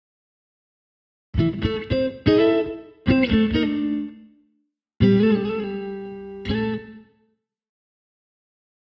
Jazz Guitar #3 109bpm
Some octaves played on guitar in Aminor
octaves, jazz, guitar